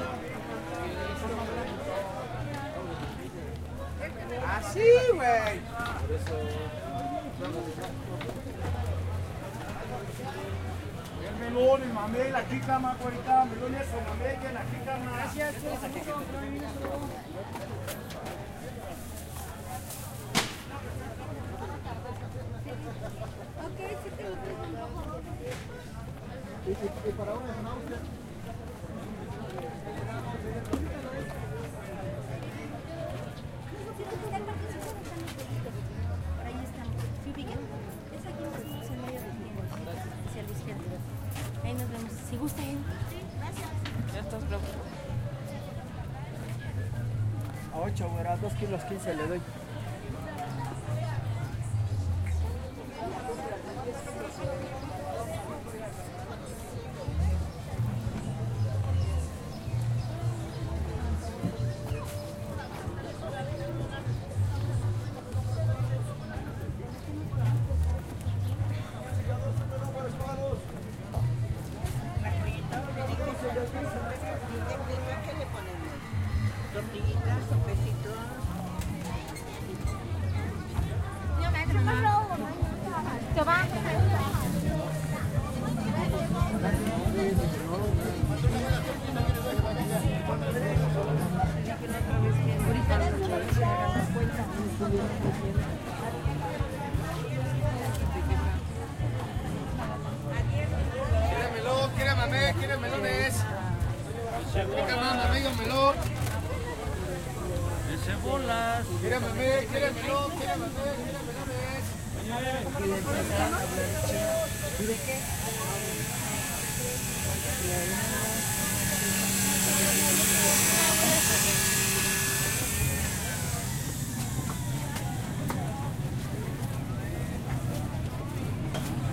Caminando en Tianguis
Walking through the Tianguis (Market) in méxico city. Salesman.
ambient, atmosphere, general-noise, market, salesman, Walking